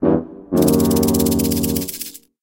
FX wait 1
A noise you might get after saying "no, wait..." and pausing dramatically.